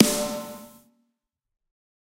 Snare Of God Drier 025
drum, drumset, kit, pack, realistic, set, snare